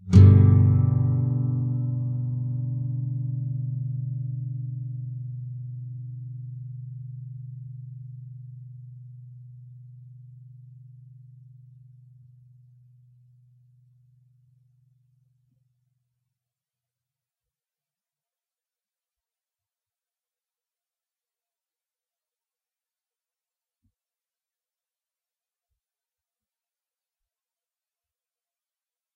G thick strs
Standard open G Major chord but the only strings played are the E (6th), A (5th), and D (4th). Down strum. If any of these samples have any errors or faults, please tell me.